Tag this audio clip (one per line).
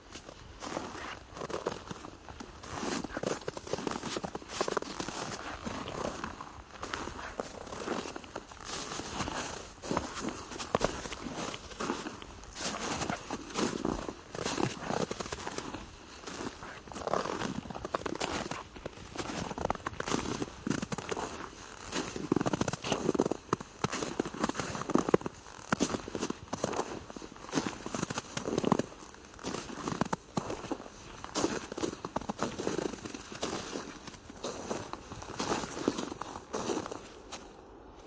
crunch
snow